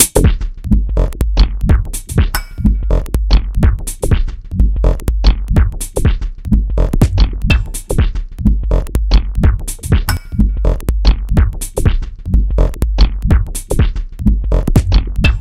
slugs on the train
machines, industrial, techno, minimal